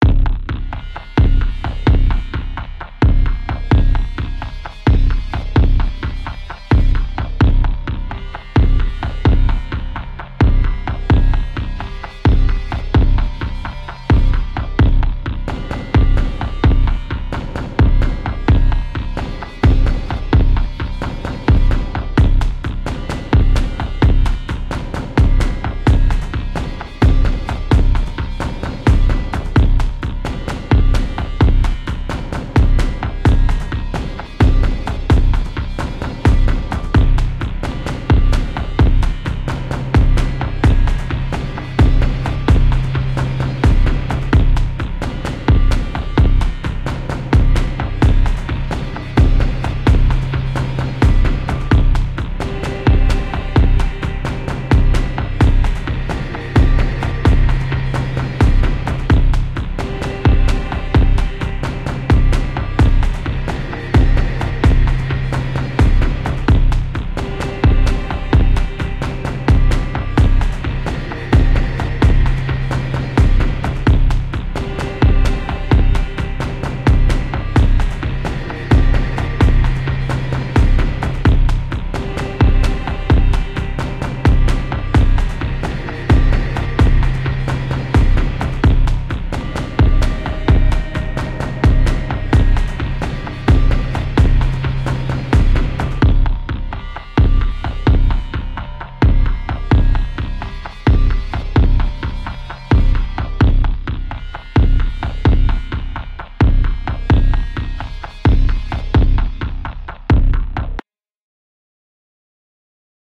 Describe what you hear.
LEAD UP TO A FIGHT MUSIC LOOP
movie, background